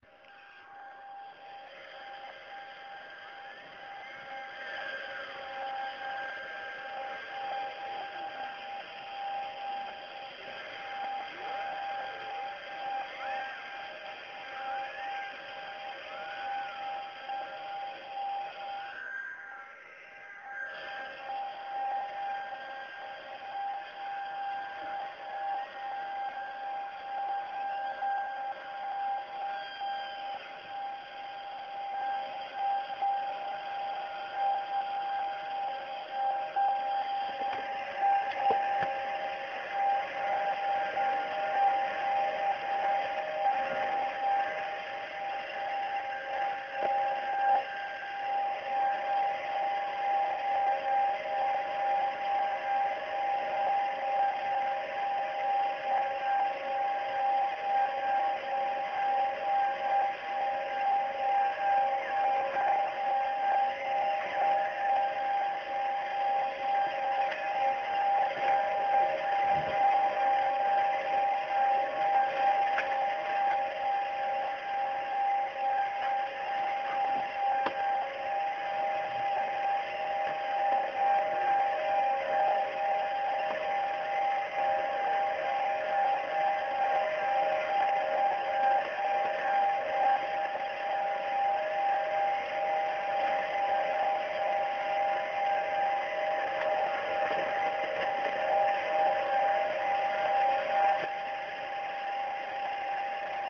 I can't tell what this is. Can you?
Some radio noise